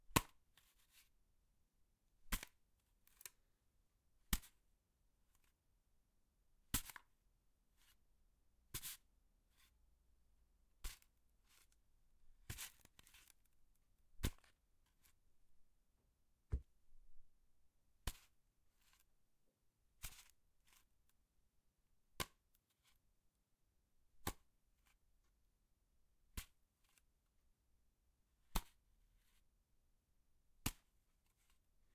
wood hit
hit, impact, wodden